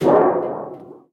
close sheet-metal impact. some crackle.
impact, gong, metal